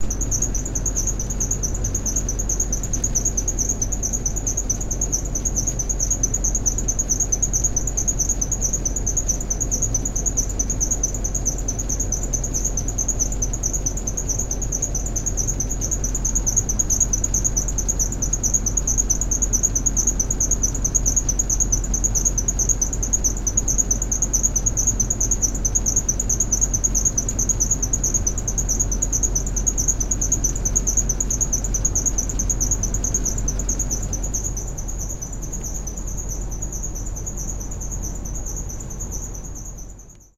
Industrial fan 4
Big industrial fan recorded by Lily Kinner.